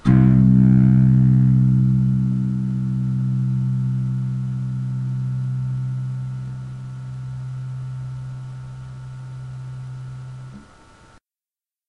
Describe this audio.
acoustic guitar lofi
lofi, acoustic, guitar